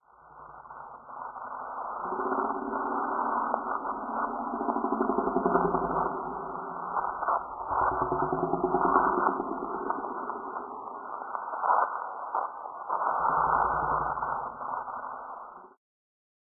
near monster
dark, growl, monster